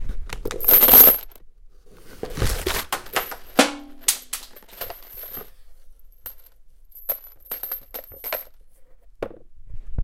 the Dry box of nails
a, box, nails